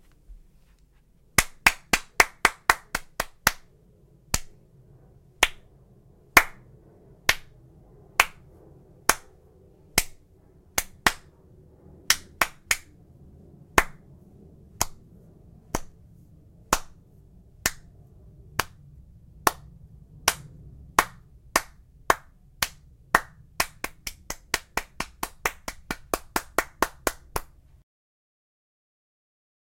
various clapping, various clapping counts.
clap, clapping